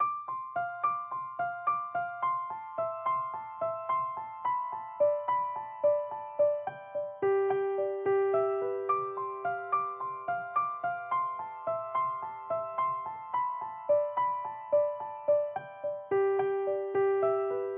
ableton piano sampler
ableton, sampler, piano